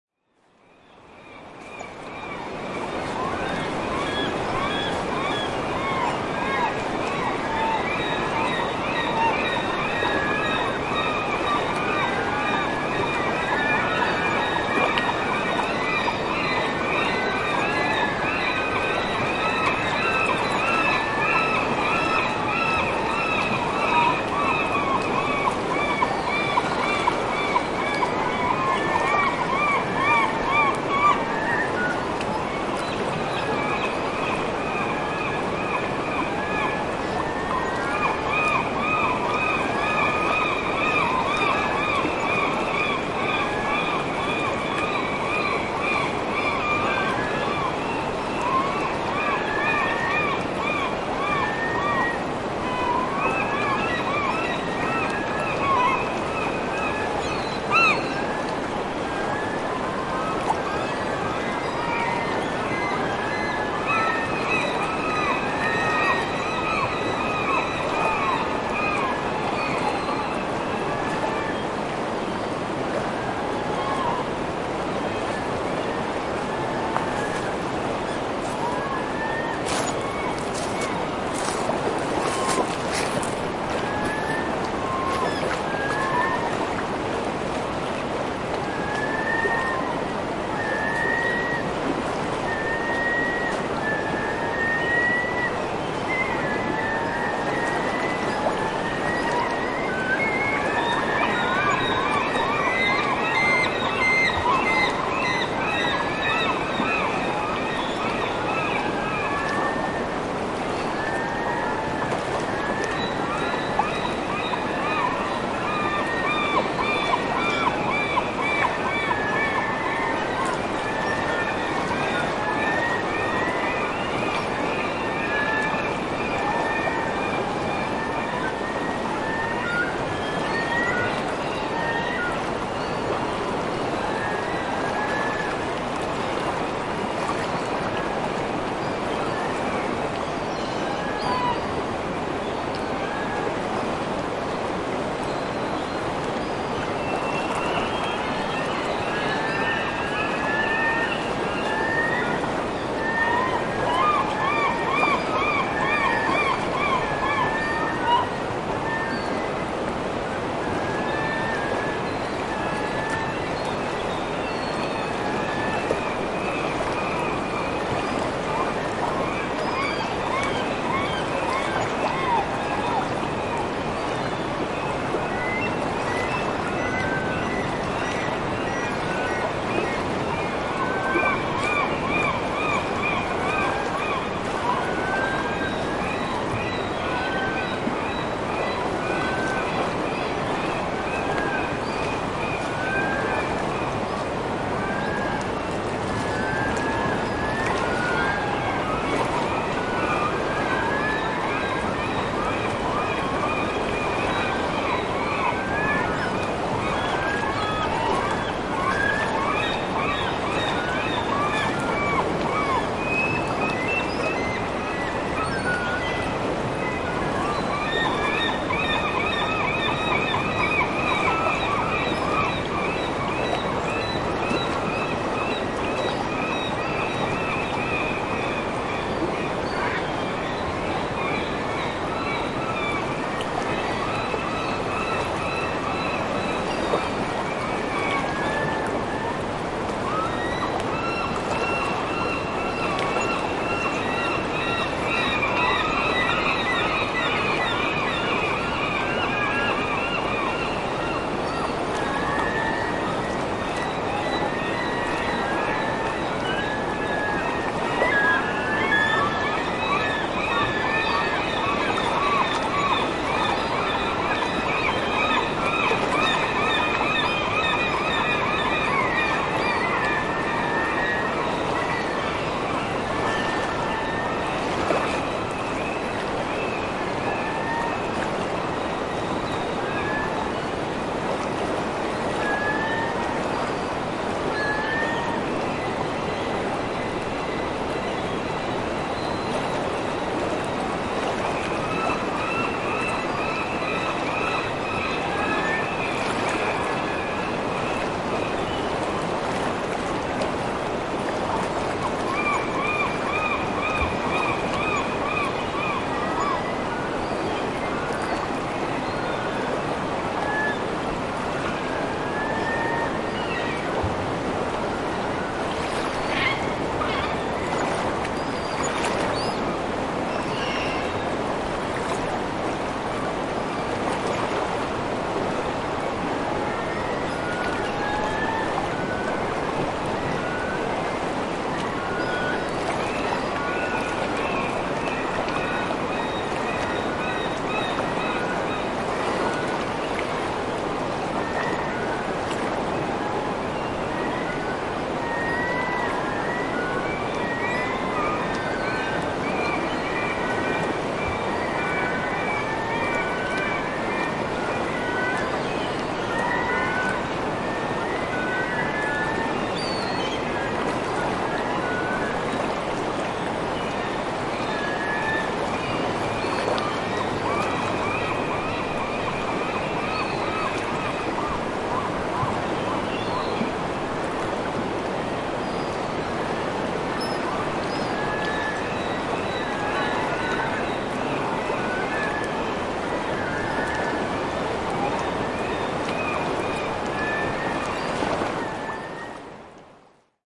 Morning on the Puntledge River during the November salmon run.